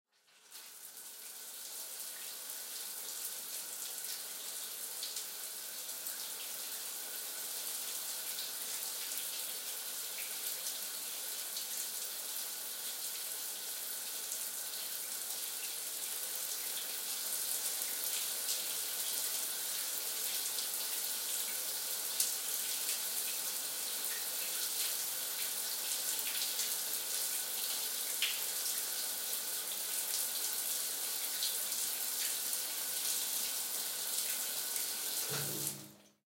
Sound of running water from the shower.